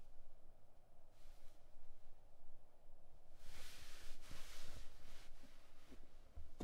clothes movement foley